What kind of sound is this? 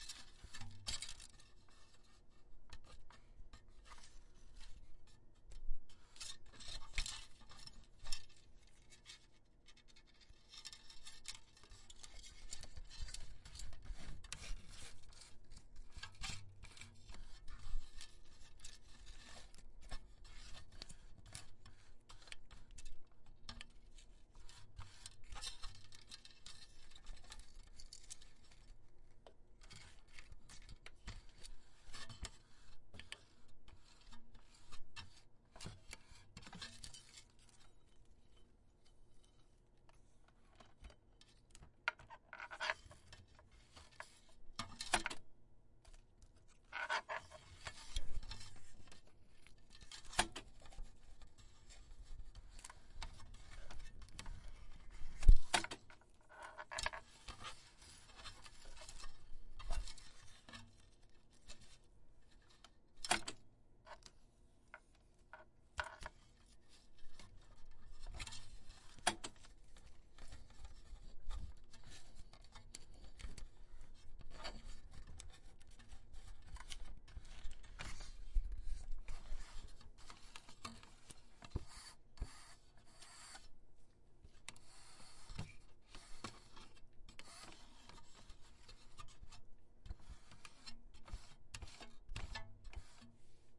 reel to reel tape machine tape and spool handling threading4 latch mechanism
reel, spool, handling